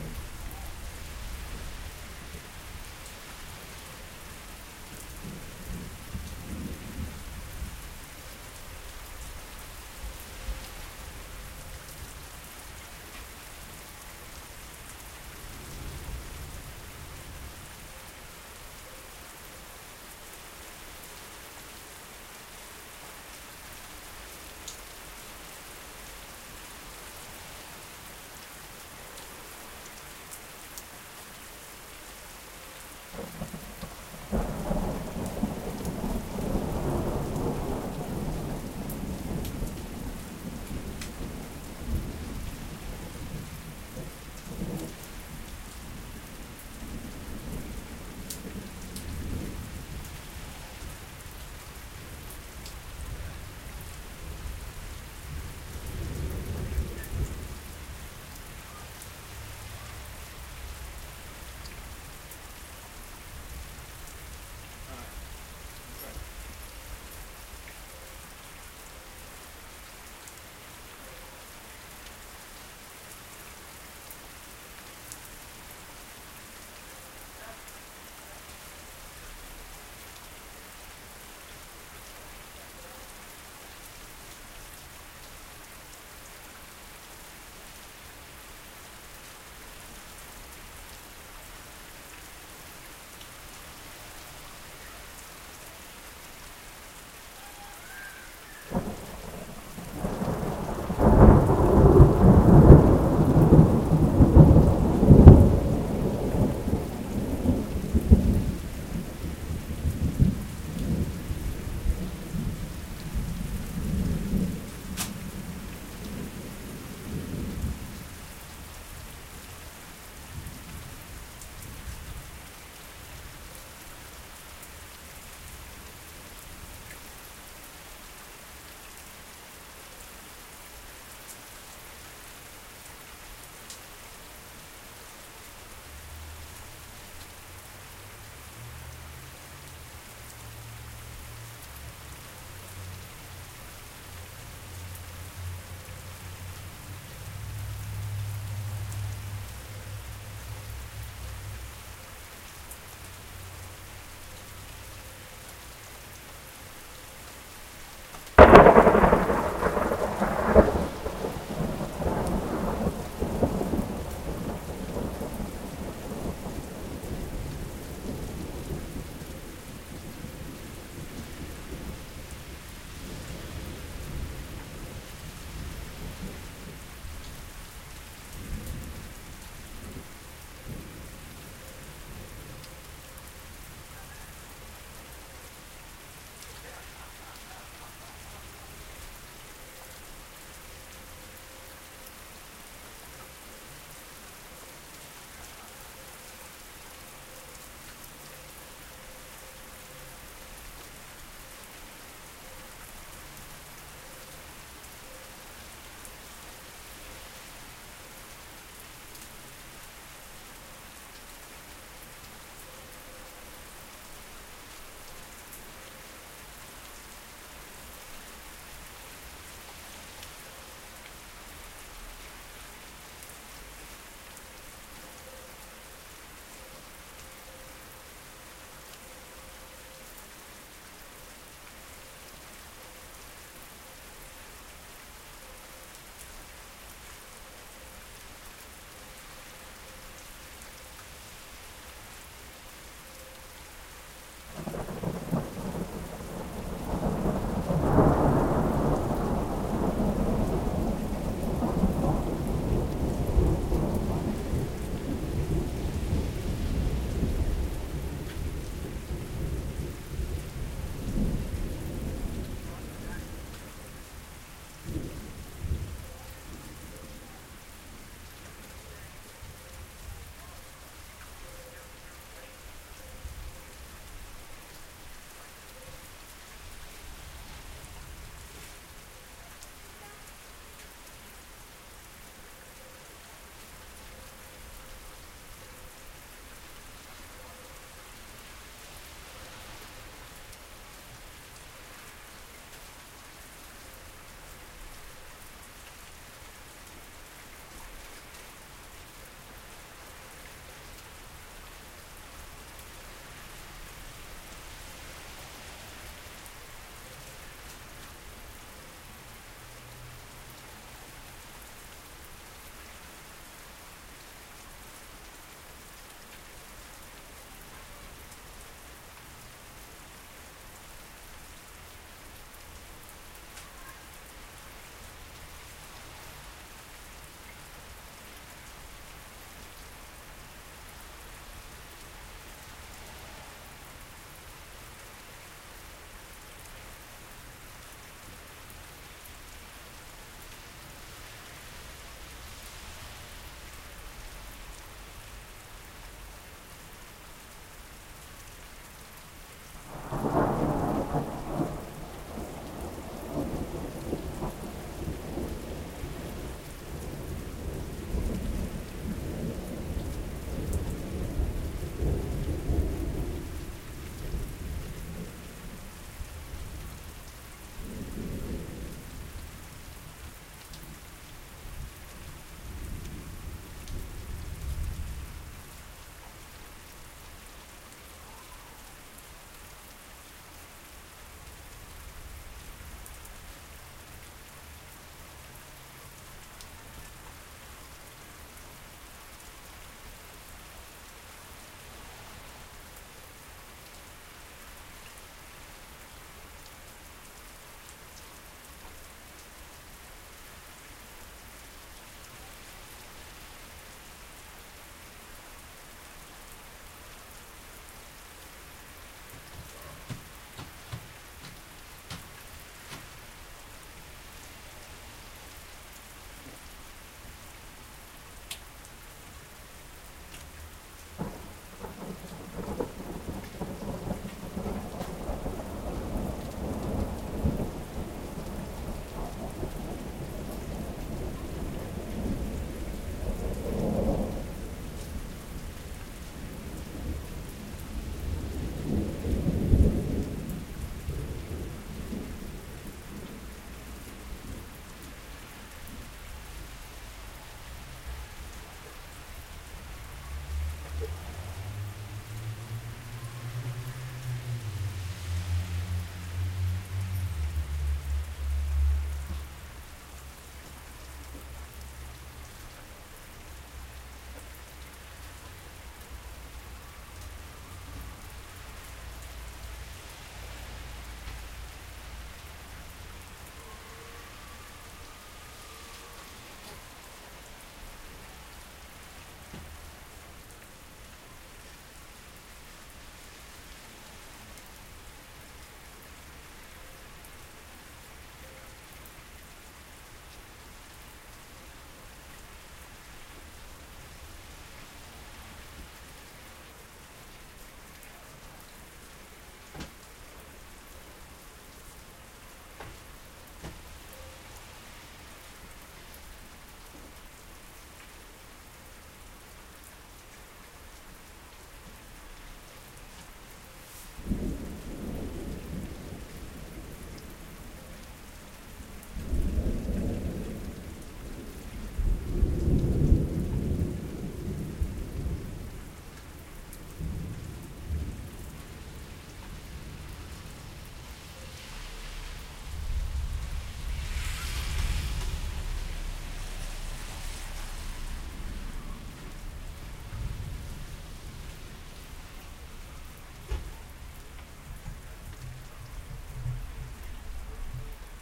suburban rain
A thunderstorm in the suburbs. Rain falling on streets, with occasional cars going by, and thunder.
thunder, rain, weather, storm, lightning, urban